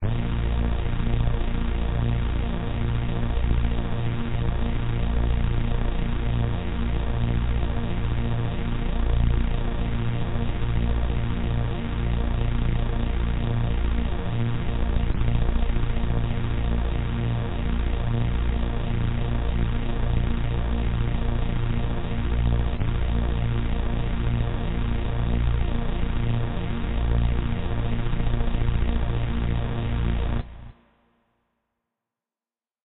LOW DRONE 003
ambience, drone, background